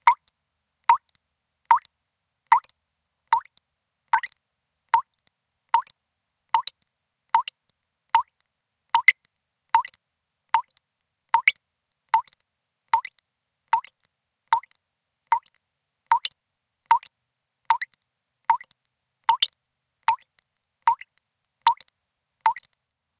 Drips moderate
Drops of water falling at a medium rate (not particularly slow or fast) into a 2-cup glass measuring container with some water accumulated in it. Some background noise remains but has been reduced to a low level -- just add your own filtering and ambience or reverb. Seamless loop.
drip, dripping, drop, faucet, kitchen, loop, plop, splash, water